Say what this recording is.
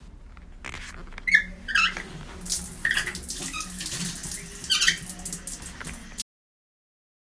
Sound 12 - squeaky shower tab handle
squeaky shower tab handle
tab, shower, water, bathroom